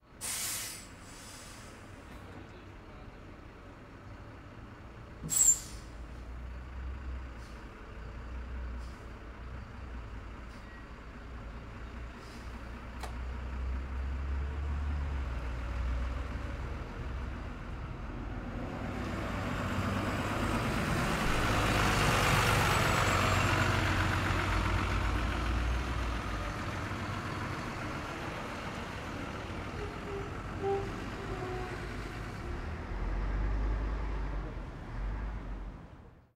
Ambience Rome Bus Station 005
Station, Ambience